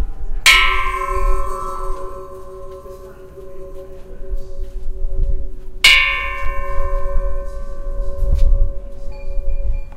metal bell
the sound of two aluminum tubes hitting together. recorded by a SONY Linear PCM recorder in a metal-welding warehouse.
bell, metal, ai09